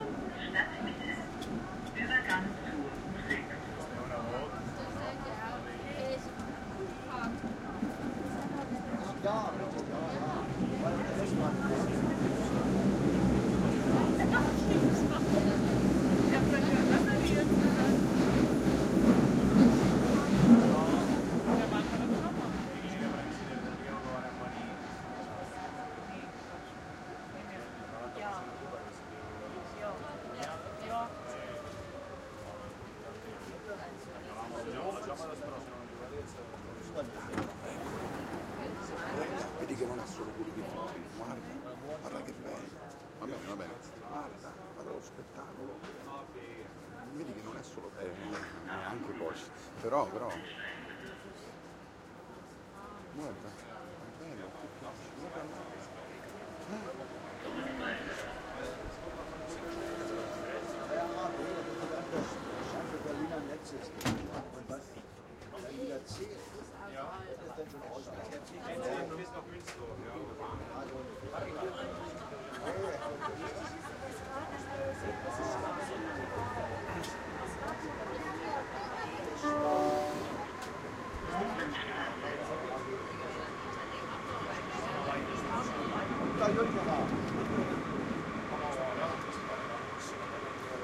metro in germania
altoparlante, efx, foley, germania, metro, metropolitana, nature, sound, sounds